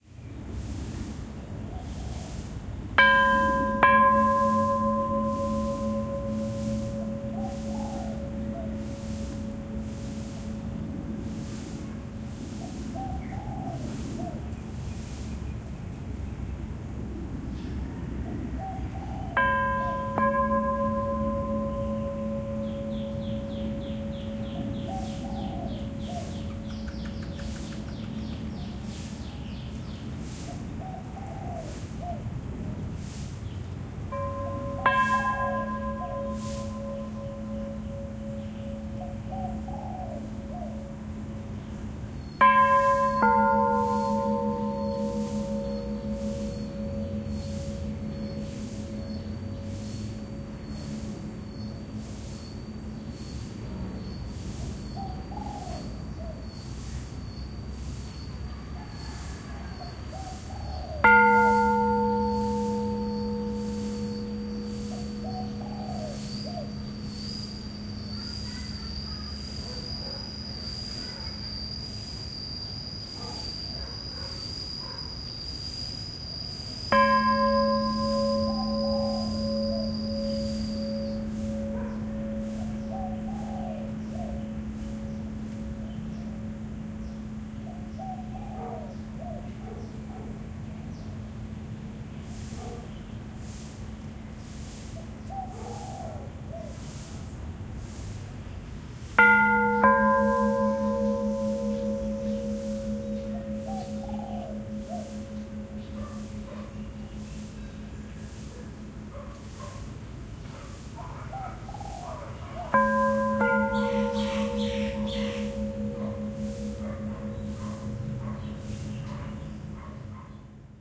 Playing with bells in a buddhist temple
Recorded myself playing with temple bells in front of a buddhist temple.
In the town of Chiang Rai, North-Thailand.
Ambiance of birds, crickets and monk sweeping the courtyard.
Recorded with a Sony PCM D100, built-in mics.
Rai, Crickets, Monk, Bells, Meditation, Nature, Zen, Thailand, Buddhist, Chiang, Temple